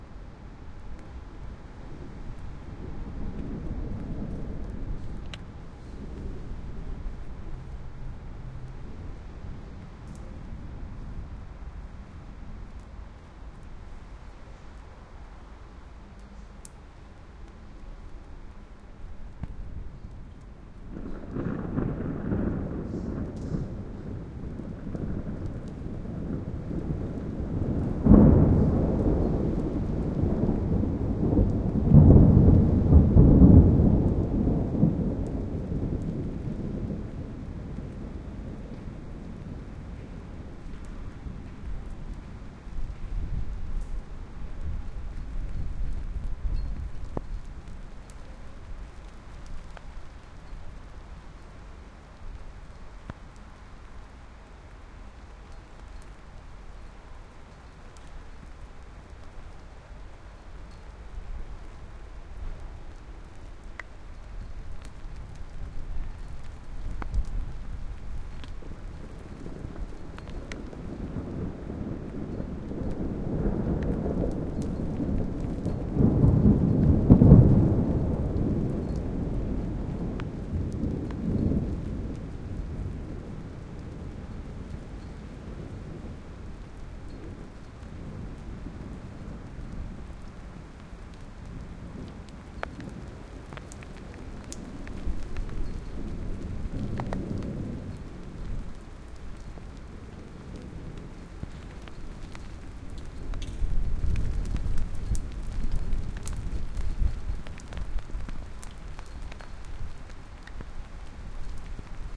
Light rain and thunder claps to close off summer. The classic development of a thunder storm with the wind playing around. Recorded with a Sony PCM-M10.